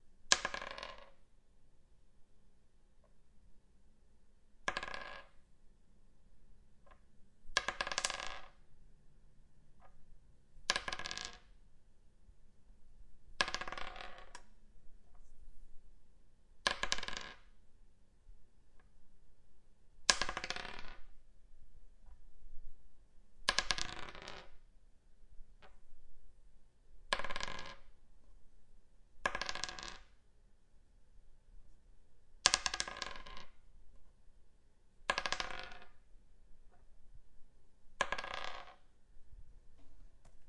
This is the sound of a single 20-sided die being rolled a few times on a wood table. Be mindful that on the 5th roll, the die hits an Altoids can.
Hey, Y'all! I'm a pretty frequent user of this site, and I'm just now starting to give some sounds back to the community that's saved my skin so many times for free. Enjoy!